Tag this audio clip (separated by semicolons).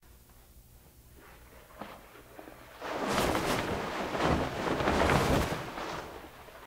Jacket; Flapping; Cloth; Foley; Clothing; Shacking; Plastic; Movement